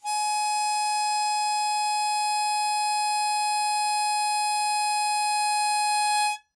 Harmonica recorded in mono with my AKG C214 on my stair case for that oakey timbre.
harmonica, key, c